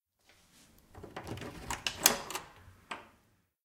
Puerta abre
Abrir, entrar, Puerta